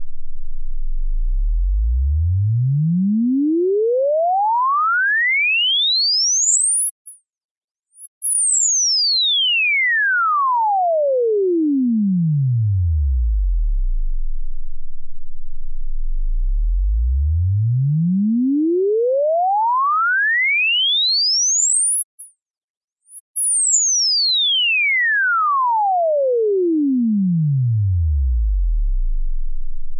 Heavily processed VST synth sounds using various reverbs, tremolo and LFO sweeps.